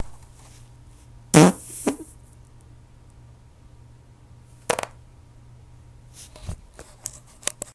Raw recordings of flatulence, unedited except to convert usable format.